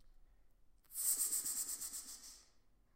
A snake hissing.
danger, hissing